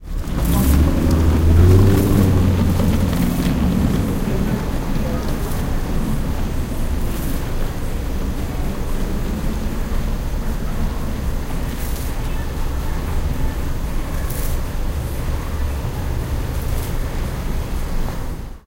0045 Music and traffic street
Music from a shop with stopped cars in a small street. Welder in the background
20120116
welder, seoul, traffic, korea